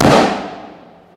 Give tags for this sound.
gun; shot; weapon; shooting

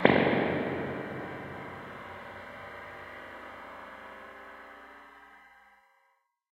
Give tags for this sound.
Impulse; IR; Convolution

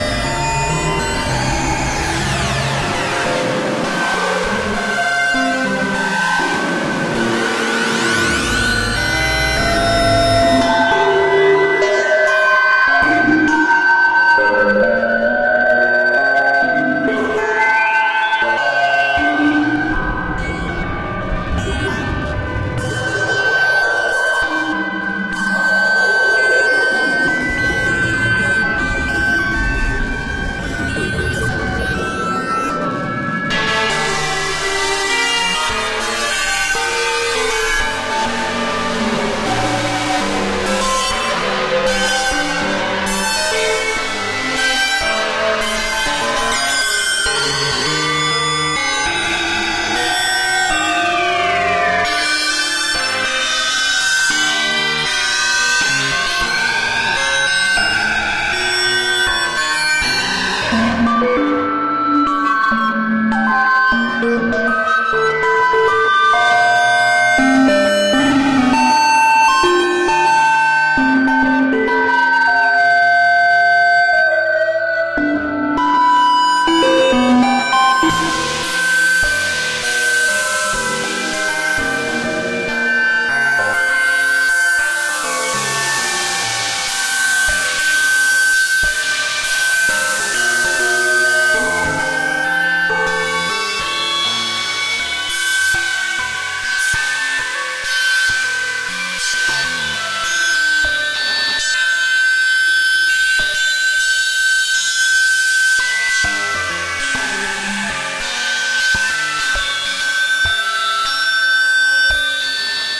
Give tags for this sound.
chimes; rnd; morphagene; mutant; frequency; pattern; step; Random; Oscillation; phaser; incidental; harmonaig; effect; mimeophon; ringing; beeps; tau; space; instruo; Droid; makenoise; wogglebug; instruments; Techy; rings; neoni; Bright; Repeating; plaits; sound

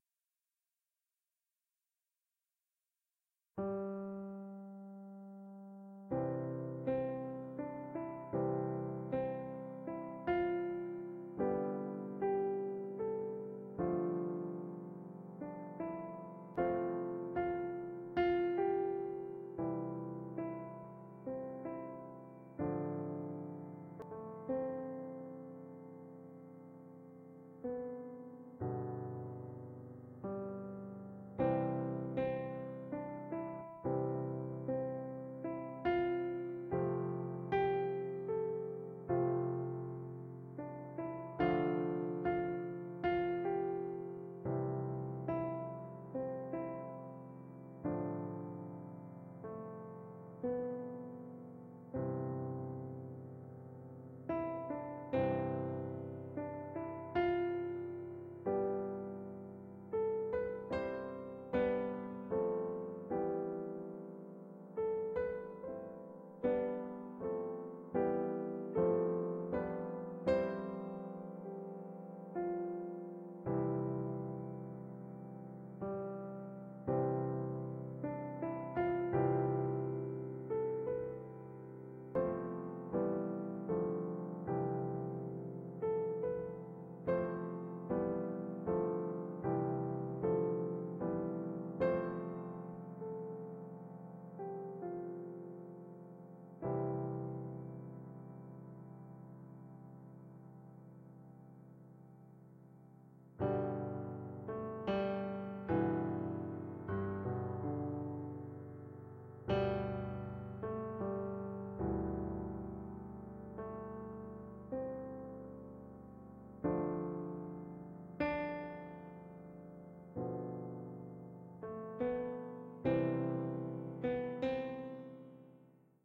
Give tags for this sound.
carols; classical; covers; my

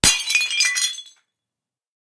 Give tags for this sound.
smash; break; ceramic

